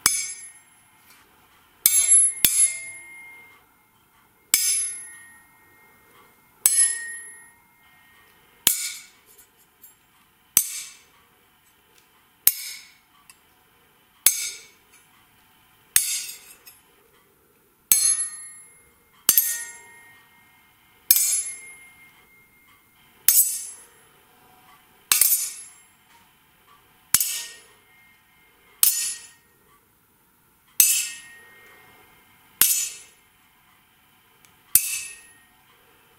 Sword hits
Made with a table knife and a dagger
blade, clash, combat, dagger, duel, fight, katana, knife, knight, medieval, metal, slash, sword, swordfight